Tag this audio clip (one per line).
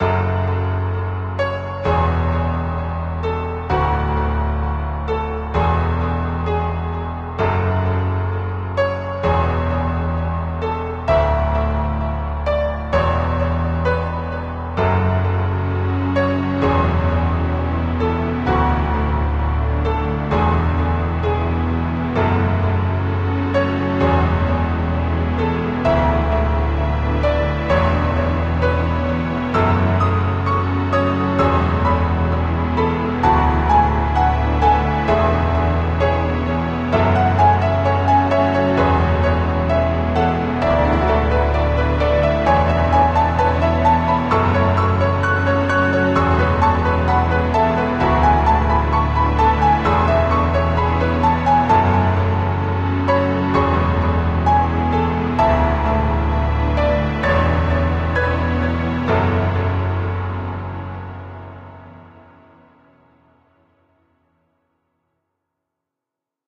remix
sad